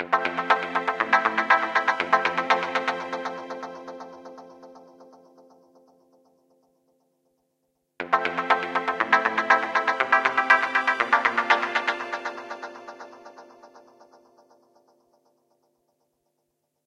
Back to 1998 120bpm
Strings, Guitar, Retro